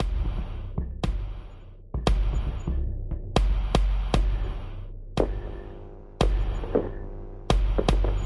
2ndBD L∞p-116BPM-MrJkicKZ
Second Bass Drum L∞p 116BPM - Champagne Rose Bonbon
You incorporate this sample into your project ... Awesome!
If you use the loop you can change it too, or not, but mostly I'm curious and would like to hear how you used this loop.
So send me the link and I'll share it again!
Artistically. #MrJimX 🃏
- Like Being whipping up a crispy sound sample pack, coated with the delicious hot sauce and emotional rhythmic Paris inspiration!
Let me serve you this appetizer!
Here you have a taste of it!
- "1 Drum Kick L∞p-104BPM- MrJimX Series"
- "Second Bass Drum L∞p 100BPM - URBAN FOREST"
- "Third Bass Drum L∞p 100BPM - $CI FI LOVE"
- "Fourth Bass Drum L∞p 100BPM - HALF ROBOT"